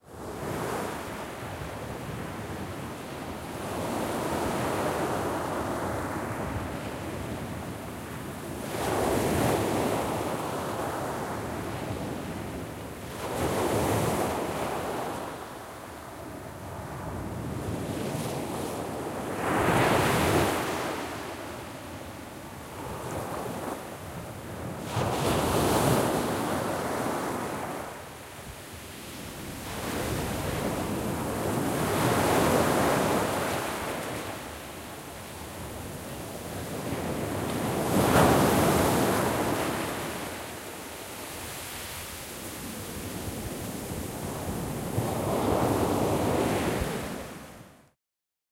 Felixstowe beach waves very close spray stereo
Field recording of waves breaking on Felixstowe Beach in Suffolk, England. Recorded using a stereo microphone and Zoom H4 recorder close to the water to try and capture the spray from the waves. Wind shield was used but a little bit of wind exists on the recording with a HPF used to minimise rumble.
Beach, England, Felixstowe, Field-Recording, nature, north-sea, Ocean, Sea, spray, Stereo, Suffolk, Summer, Water, Waves, wind